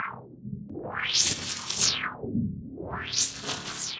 delphis PLASTIC CRUNCH LOOP 05 #120
percussion, crunch, plastic, loop